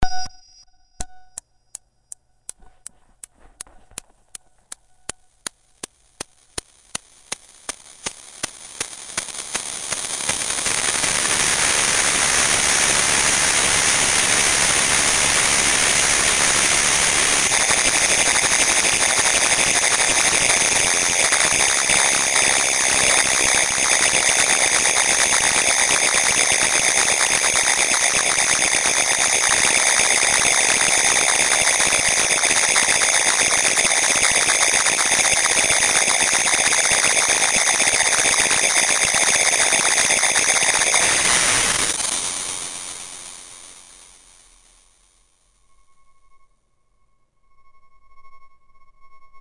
Harsh microphone feedback. (sounds different after download)
electronic, digital, noise, static, harsh, feedback, grating, glitch